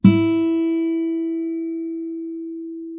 hard high
The 2 second sound of the .014 heavy acoustic gauge string
guitar, no-noise, strings